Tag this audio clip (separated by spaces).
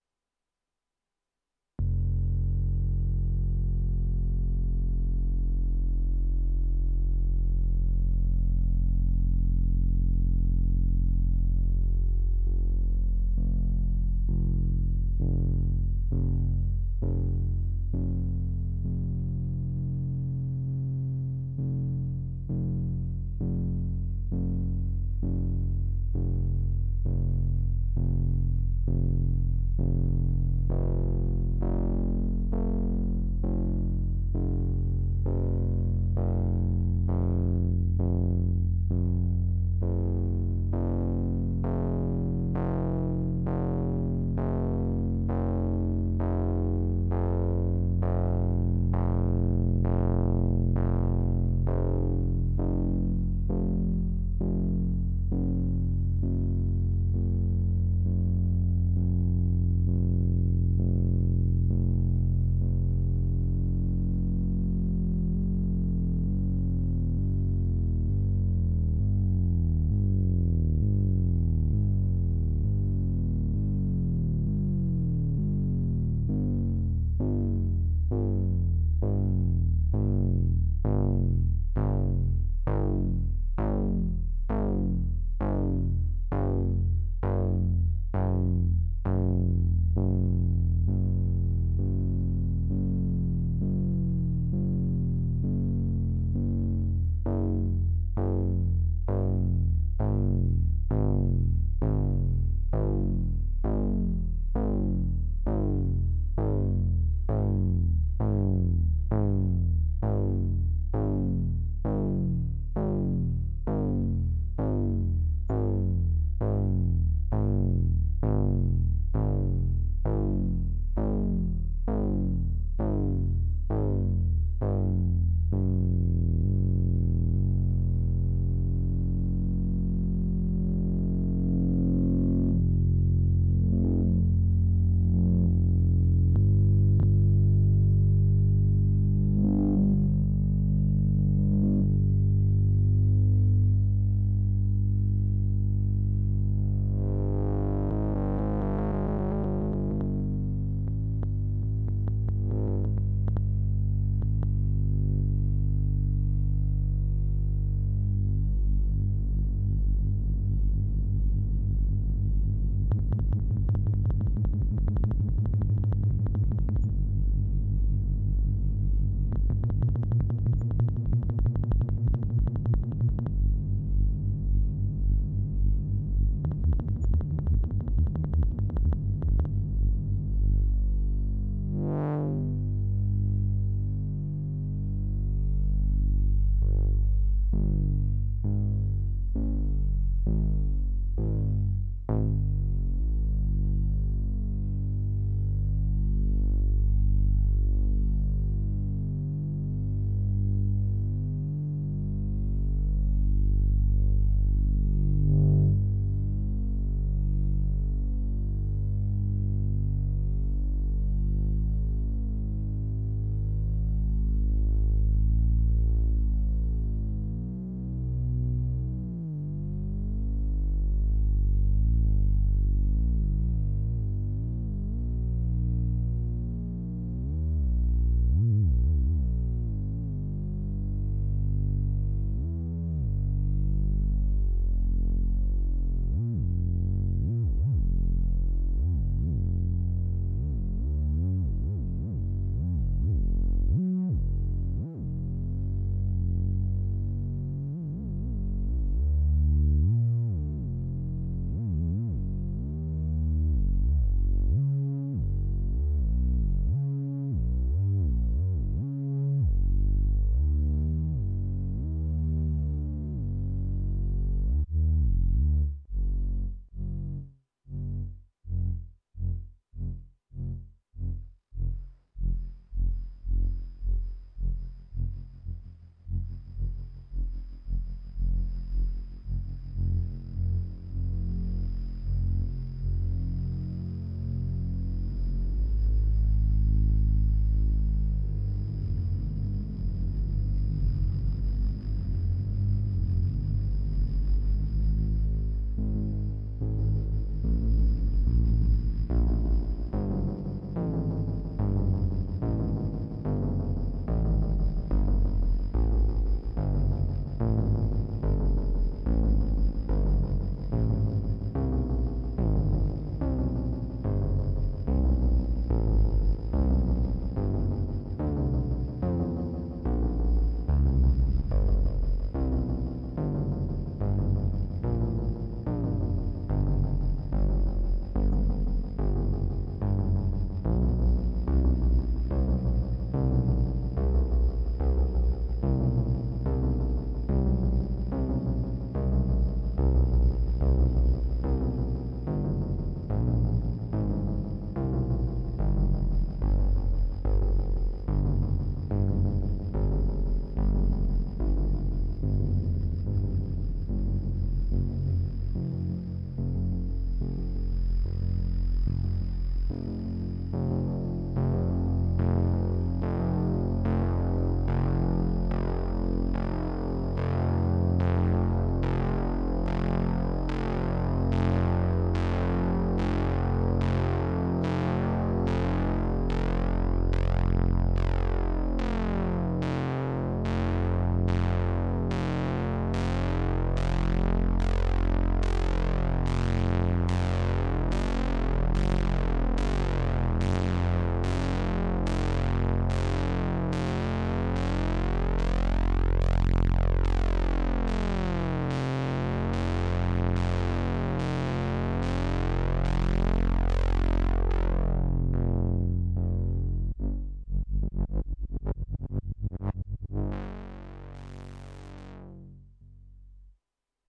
general-noise synth background-sound soundtrack atmospheric volca background keys